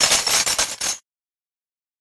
delphis DAMAGED GLASS 7
Selfmade record sounds @ Home and edit with WaveLab6
damaged, fx, glass